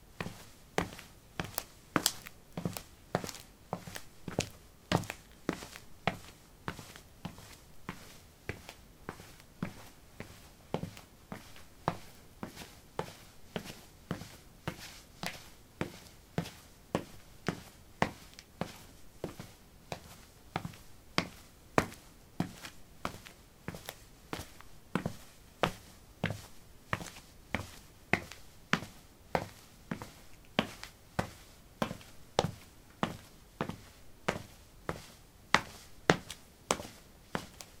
concrete 06a ballerinas walk
Walking on concrete: ballerinas. Recorded with a ZOOM H2 in a basement of a house, normalized with Audacity.